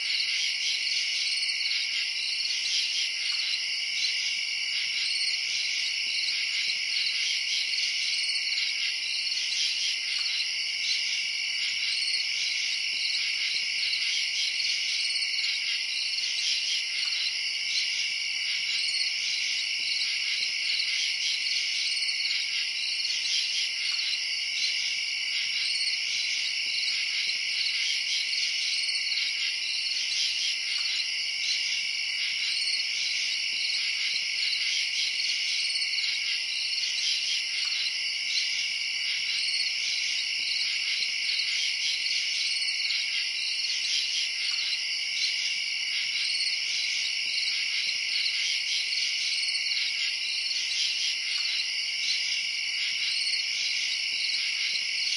crickets residential night suburbs10 cu nice looped
night, residential, crickets, suburbs